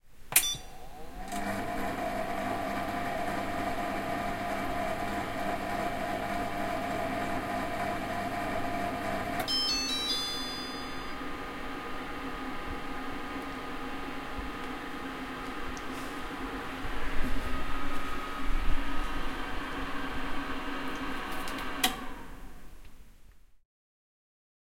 multi kitchen machine
Raw multifunction kitchen machine sound from start to finish, on/off clicks and iddle beep sound. Captured in a middle size kitchen (some reverb) with zoom H4n. Normalized/render in Reaper.